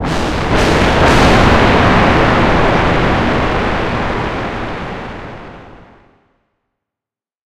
Tri-Thunder Pile-Up 3 (50% Reverb)
An epic pile-up of three thunderclaps with a fair amount of reverb. Of course, they're not actually thunderclaps, but they do sound similar.
reverb, thunder, thunderclap